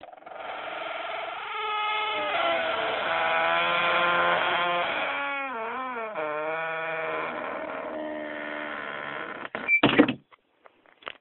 door creak
A very long door-creak. Not a very good sound quality as being recorded with my cell phone.
close, lock